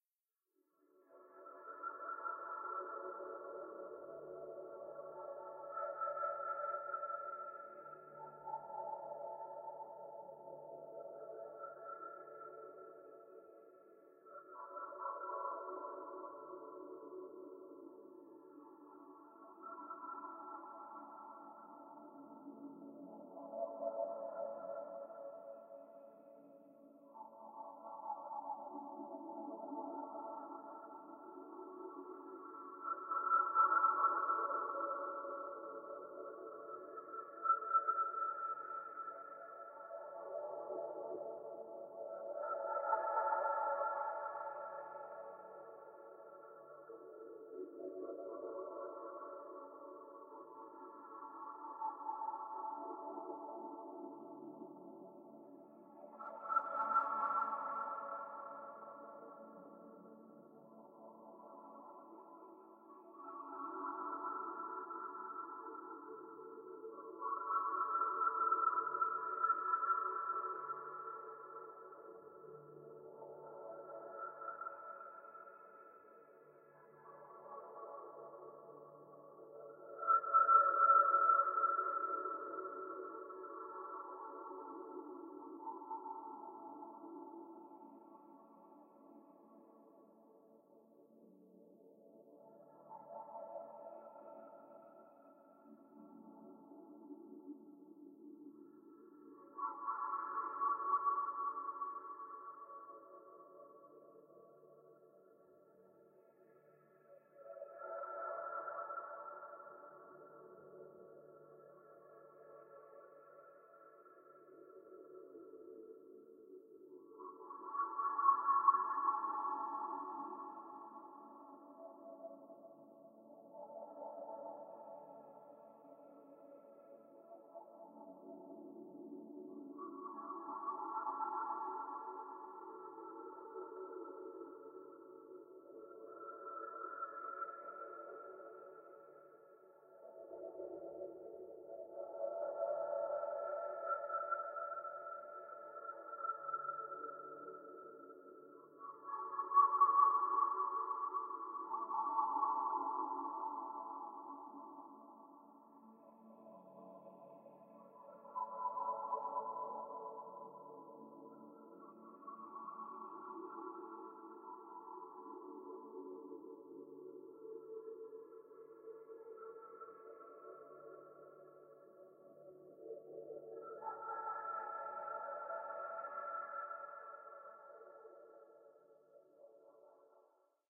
Dmaj-water3
A pad I created for my music. Used in "Elements" LP, track 2 "Water basin". In Dmaj.
ambien, pad, water